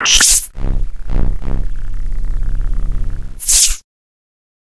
Laser Sword (Ignition/Waving/Putting Away)
Quick audio sequence of a lightsaber-like weapon being ignited, waved around, and being put away. Originally created for a parody sketch.
humming, laser, hum, fi, up, techno, fire, saber, woosh, swing, parody, weapon, light, sci, lightsaber